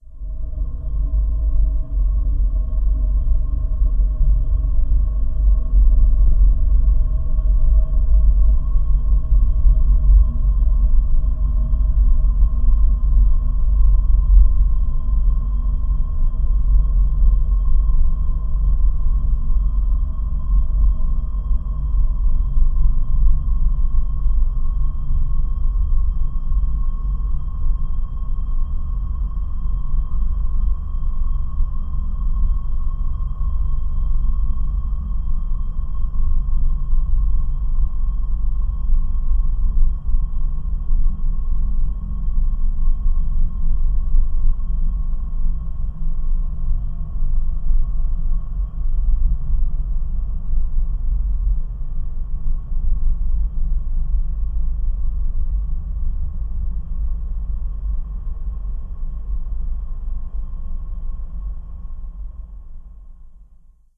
Atmospheric sound for any horror movie or soundtrack.
Atmosphere, Evil, Freaky, Halloween, Horror, Scary, Terror